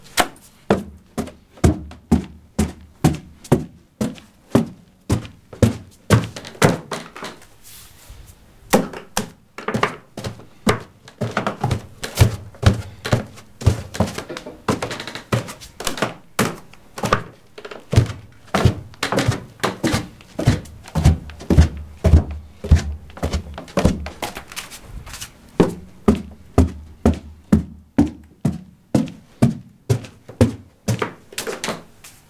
Footsteps Wooden Stairs 3
I'm walking up or down wooden stairs. A bit of wooden cracking can be heard. Recorded with Edirol R-1 & Sennheiser ME66.
running, run, walk, stairway, footsteps, staircase, cracking, stair, wood, feet, stairs, walking, old, wooden, foot, steps